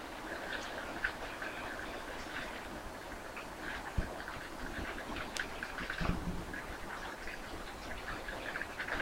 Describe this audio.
Rain on roof
I was up stairs and it started raining so I plugged my $14 Shotgun mic into the computer and made this recording.
Environment, house, Rain, roof, Storm, water, Weather